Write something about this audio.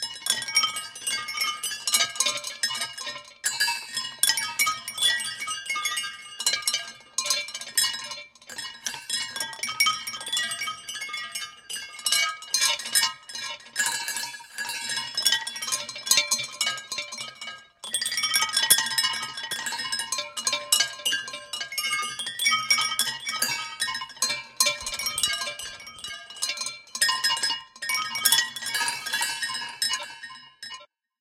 Recording of some glasses being hit. Recorded with a Zoomh1 and edited (eq, compression, delay) in Logic Pro.